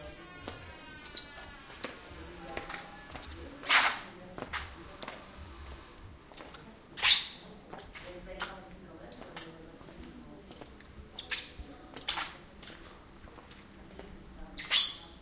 session 3 LBFR Amélie & Bryan [2]
Here are the recordings after a hunting sounds made in all the school. Trying to find the source of the sound, the place where it was recorded...
labinquenais, france, rennes, sonicsnaps